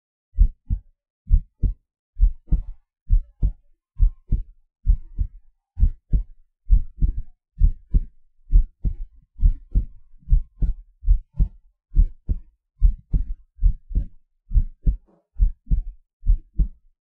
This is a genuine Non-synthesized heart beat sound. I recorded it on a cheap computer microphone, and digitally enhanced it.